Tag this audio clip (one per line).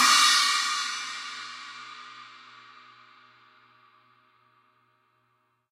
china
cymbal
drum
heavy
kit
metal
octagon
rockstar
tama